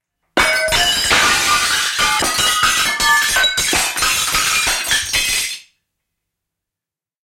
Lasia rikki, ikkunalasi / Glass break, window panes break on the floor, edit
Lasiruutuja rikki lattiaan, lasi. Editoitu.
Paikka/Place: Suomi / Finland / Nummela
Aika/Date: 11.12.1984
Finland, Rikkoutua, Yle, Break, Ikkuna, Suomi, Lasiruutu, Window-pane, Breaking, Soundfx, Yleisradio, Lasi, Tehosteet, Finnish-Broadcasting-Company, Crash, Glass, Rikki, Pane